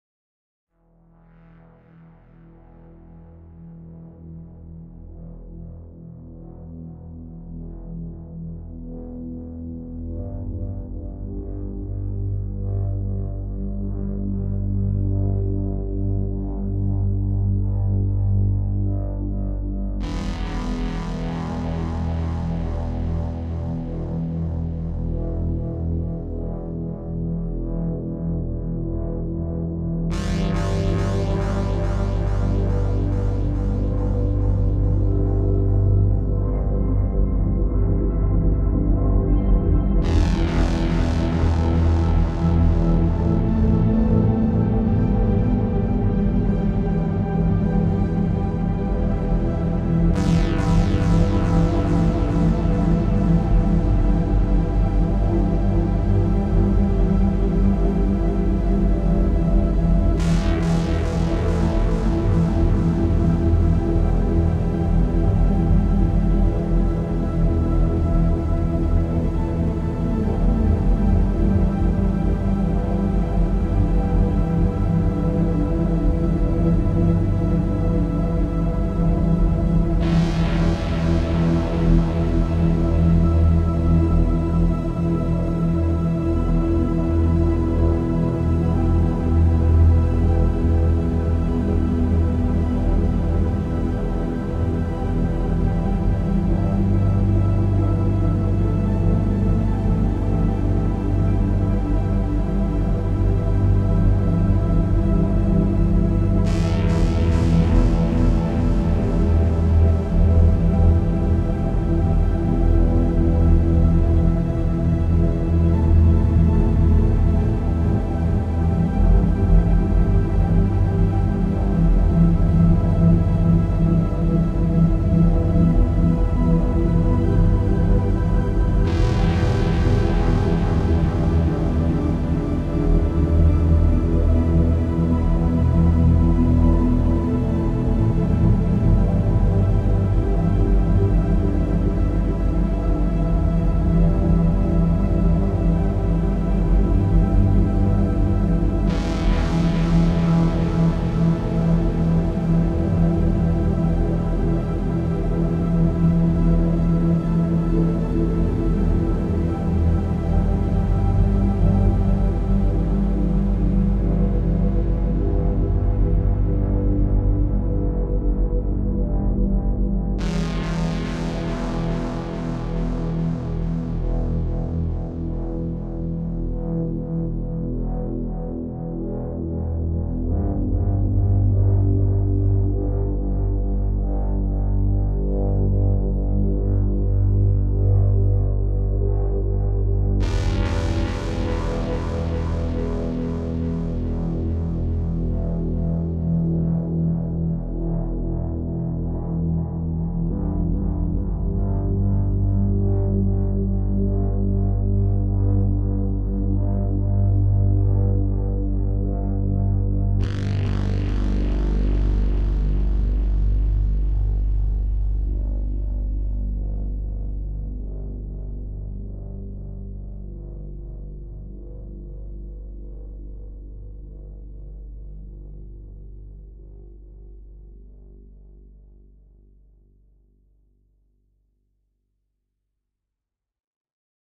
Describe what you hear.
Invalid Argument
Perfect background music for urbex, sci-fi, or horror films.
creepy, analog, synth, dark, atmospheric